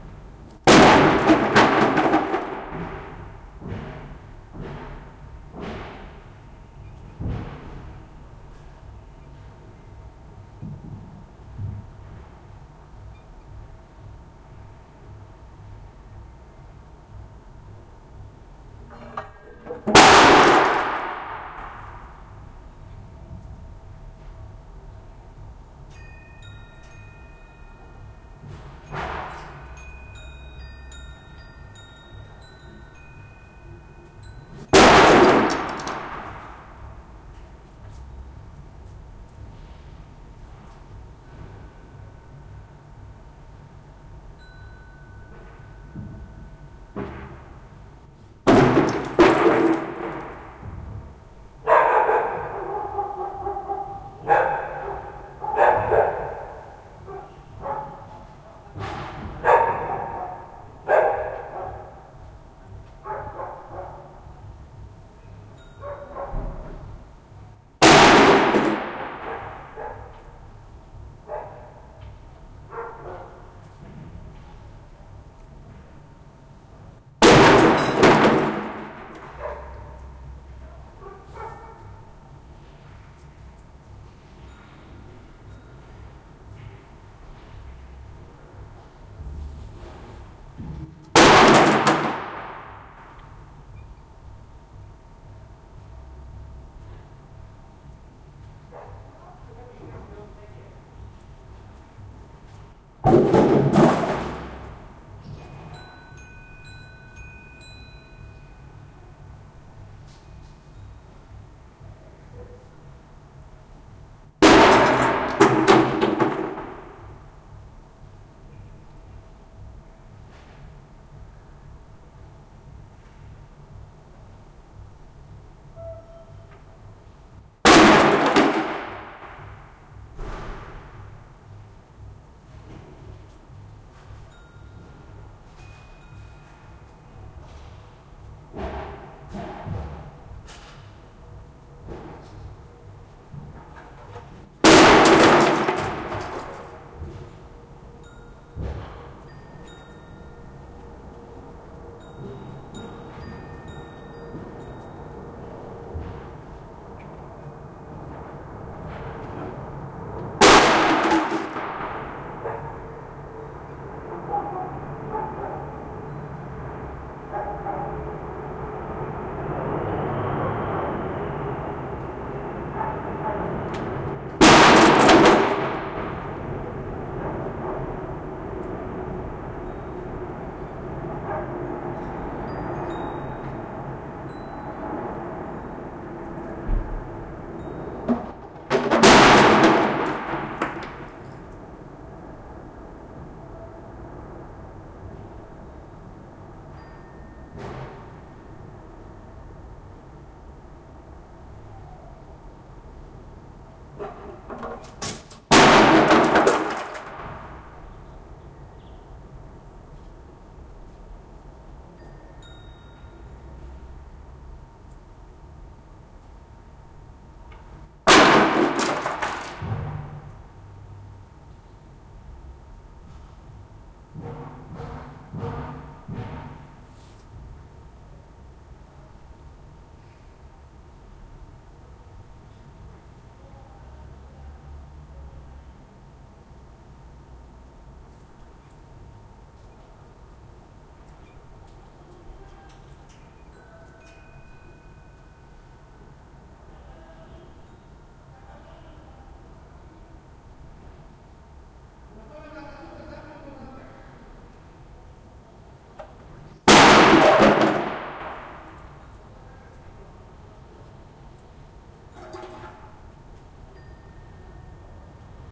thud thud 170611
17.06.2011: about 16.00. tenement on Gorna Wilda street in Poznan/Poland. I was recording from my balcony (first floor). some guy is throwing away by the window old furniture (third floor). extremely noisy thud thud sound.